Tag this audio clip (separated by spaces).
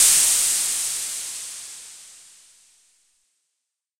drum electronic